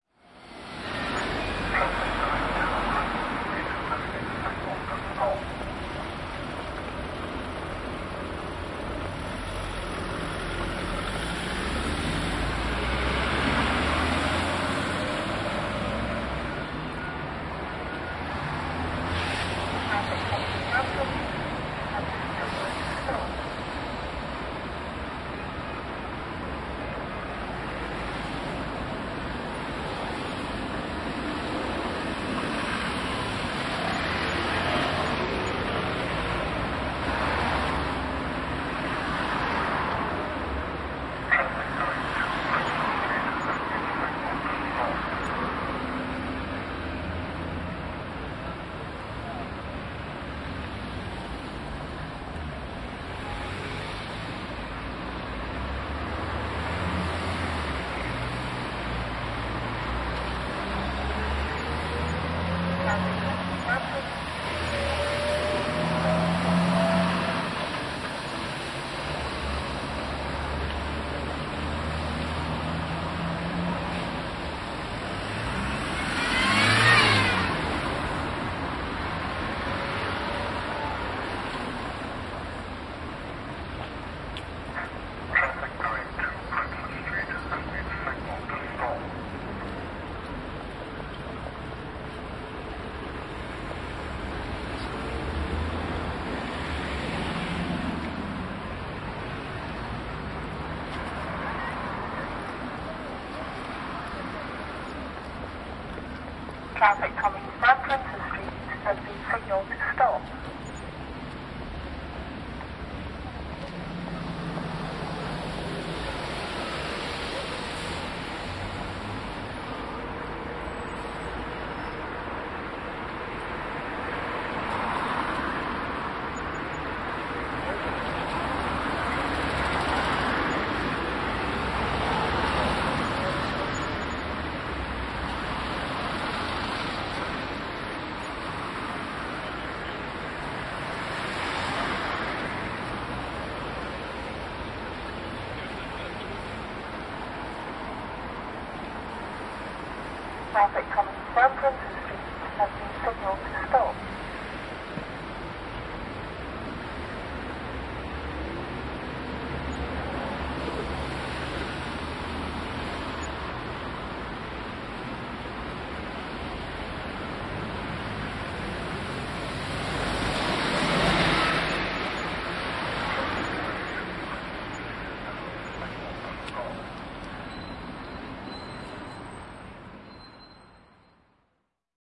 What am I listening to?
talking traffic lights2
Talking traffic lights/pedestrian crossing at the bottom of Leith Street, Edinburgh.
Recorded on a Sharp minidisc recorder and a Audio Technica ART25 stereo mic